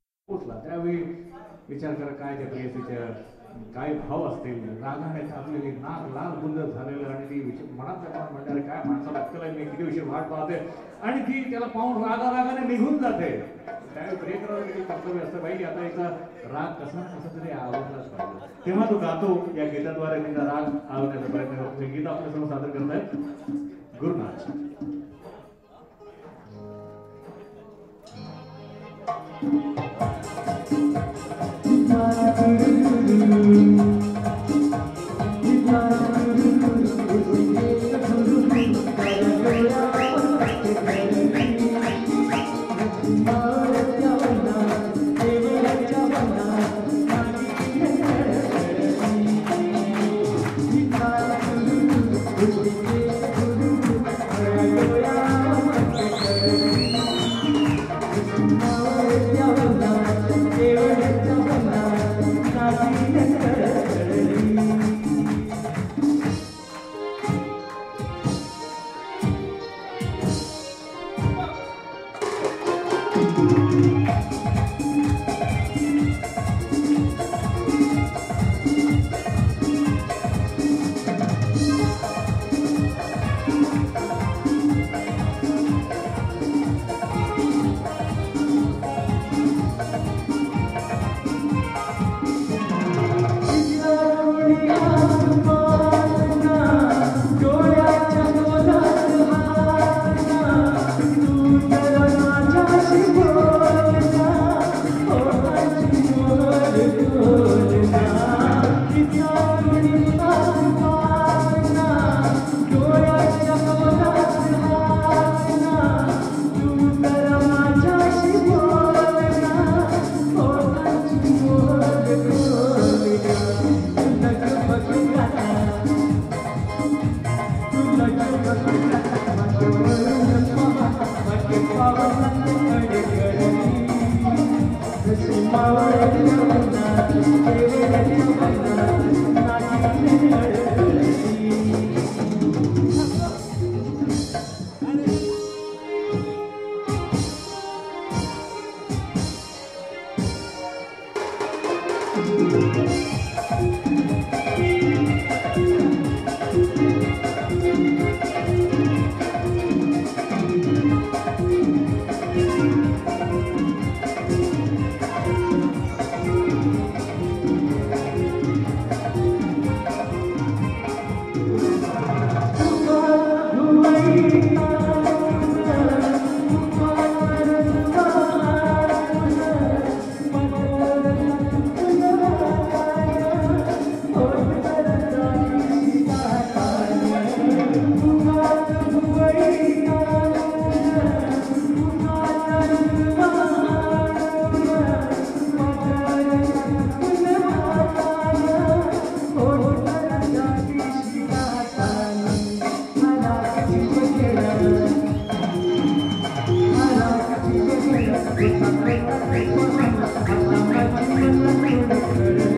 Recorded in Bangkok, Chiang Mai, KaPhangan, Thathon, Mae Salong ... with a microphone on minidisc
machines, street, temples, thailand